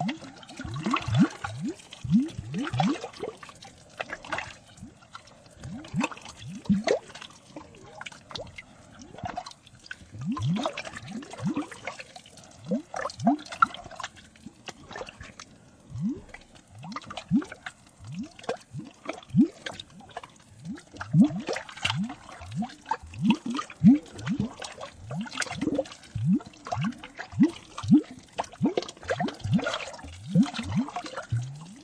ELEMENTS WATER 02 Phasin-bubbles
Sound created for the Earth+Wind+Fire+Water contest
recordings of some water bubbles running smoothly
varying pitch and time, equalisation, panning and presence to each one
a bandpass filter was used fo the entire group
Then gently phased for add that liquid deepness feeling.
Small amount of delay and a touch of reverb.
Planned as a score sequence, is an ttempt to recreate
an immersion in a liquid world (like the Earth ; )
bubbles, underwater, liquid, fx, water, immersion